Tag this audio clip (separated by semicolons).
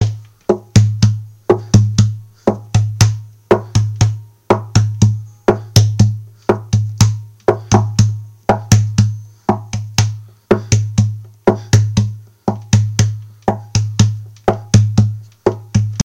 piano; loops; voice; guitar; bass; drums; loop; percussion; acapella; Indie-folk; drum-beat; sounds; melody; indie; harmony; looping; acoustic-guitar; rock; free; Folk; whistle; synth; beat; vocal-loops; original-music; samples